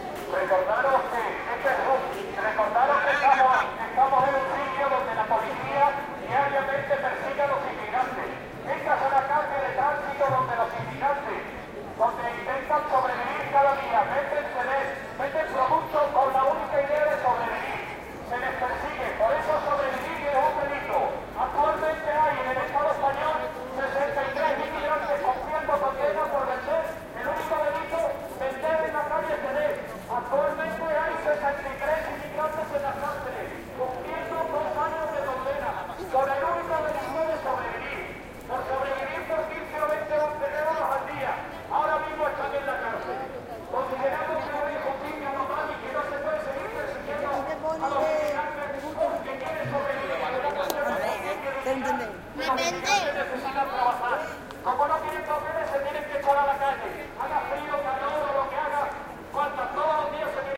speech using a megaphone in a demonstration against upcoming modifications to Spanish immigration law to make it more restrictive. Some voices of passing people can also be heard. Recorded along Calle Tetuan (Seville, Spain) using Edirol R09 internal mics
city, drum, field-recording, marching, megaphone, parade, percussion, seville, slogans, spanish, vocal